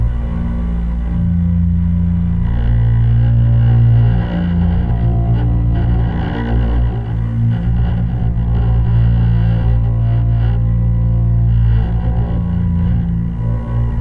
Ambience Sinister Electric Cello Loop 03
An electric cello ambience sound to be used in sci-fi games, or similar futuristic sounding games. Useful for establishing a mystical musical background atmosphere for building up suspense while the main character is exploring dangerous territory.